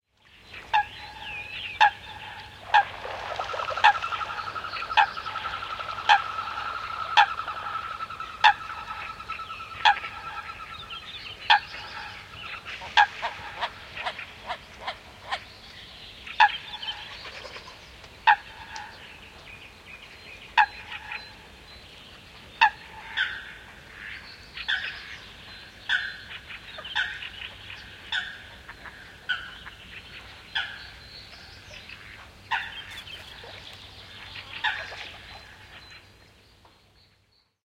Nokikana / Coots calling on a lake in the spring, some waterbirds, wings, water sounds, small birds in the bg (Fulica altra)
Nokikanat ääntelevät järvellä, kevät, toinen vastaa lopussa, vesilintuja, siivet, veden ääniä. Taustalla pikkulintuja. (Fulica altra).
Paikka/Place: Suomi / Finland / Lohja, Lohjansaari, Maila
Aika/Date: 21.05.2000
Water, Luonto